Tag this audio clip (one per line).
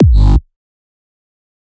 bass
Hardstyle
loop
Reverse